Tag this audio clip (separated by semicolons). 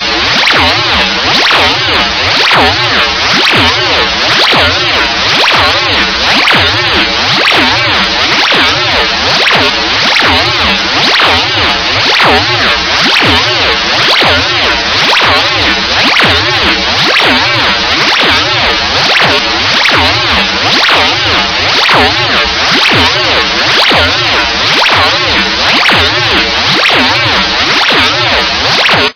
sound; a; created; weird; space